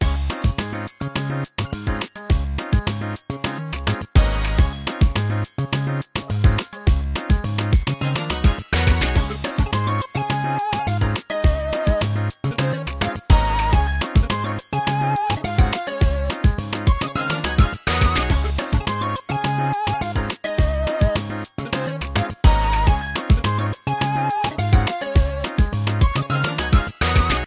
very lush and swag loop
90s, 8-bit, chip, video-game, jazzy, vgm, loop, fusion, retro, chiptune